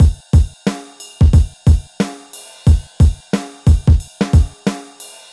fat beat 2
Just a simple beat i recorded live then fixed up in the demo version of Fl
deep, free, garage, drums, cool, kit, sound, snare, bass, beats, heavy, beat